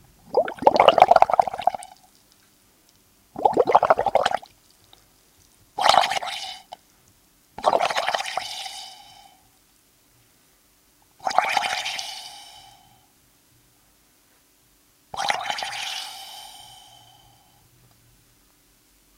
bubbles and hiss
This could be a science experiment or a torture. In fact it was me blowing through a drinking straw into a glass of water